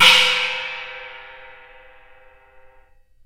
12 inch china cymbal struck with wooden drumstick.
china, chinese, cymbal, percussion